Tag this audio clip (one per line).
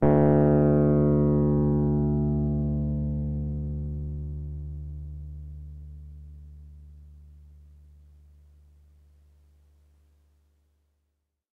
electric
e-piano